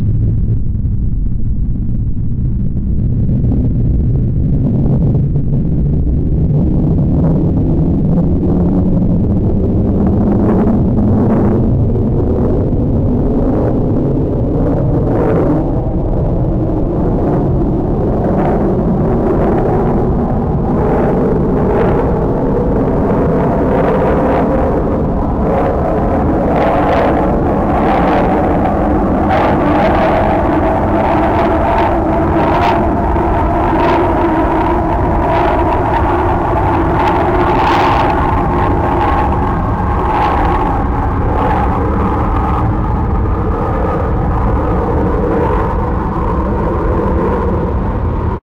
someone asked for the sound of a rocket blasting off, I imagined this may resemble (at least remotely). It's a remix of the sound of an airplane by Pingel, with pitch and tempo changed